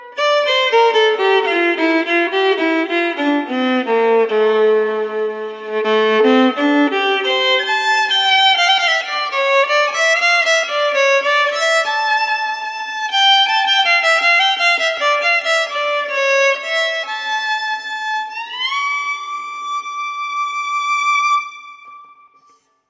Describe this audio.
Classical Violin Minor
This high-quality sound effect captures the emotional sound of a classical violin playing a sad arpeggio that ends on a high note. Perfect for sound designers, filmmakers, and content creators looking to add depth and poignancy to their project. The arpeggio builds up to a climax with the high note, conveying a sense of sadness and longing. Add this emotive and poignant sound effect to your library today.